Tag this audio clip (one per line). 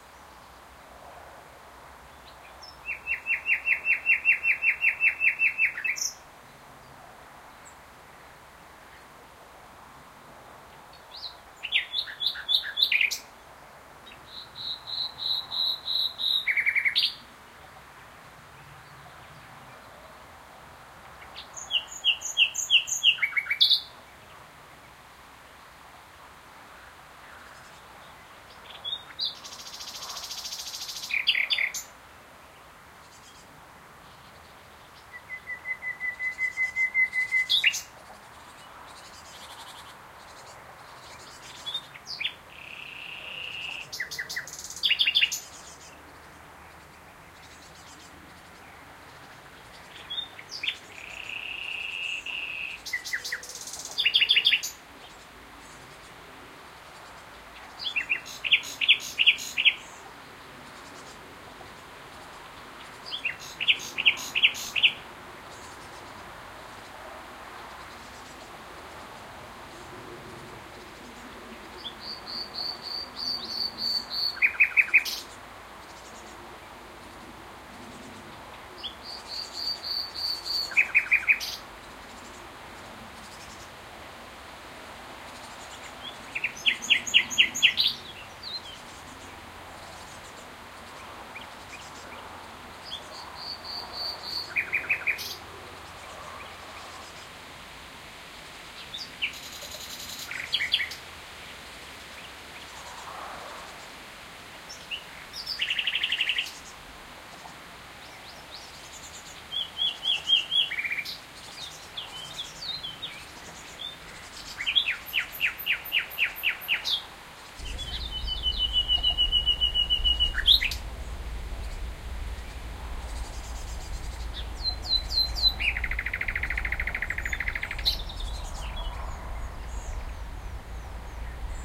binaural
bird
birdsong
environmental-sounds-research
field-recording
nachtigall
nature
nightingale